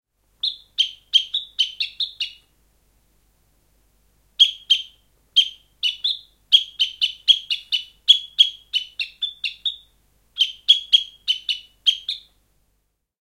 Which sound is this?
Sound of sparrows with bird call (decoy). Sound recorded with a ZOOM H4N Pro.
Son de moineaux d’eau fait avec un appeau. Son enregistré avec un ZOOM H4N Pro.
birds, appeau, sparrow, decoy, cri, animals, bird, moineau, birdsong, animal, calling, bird-call, oiseau, nature, call